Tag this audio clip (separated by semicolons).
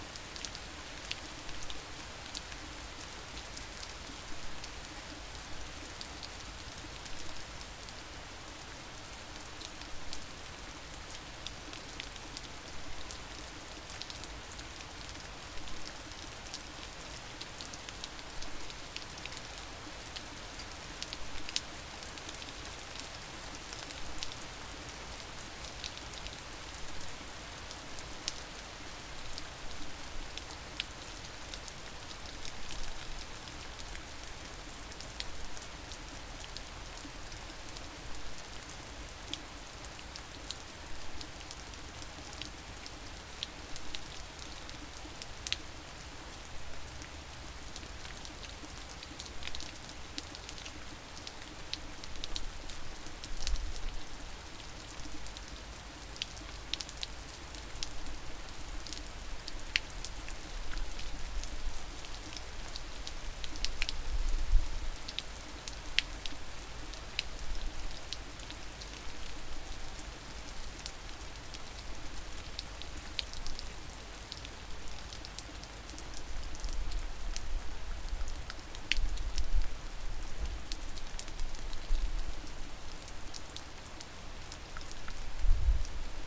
rain; water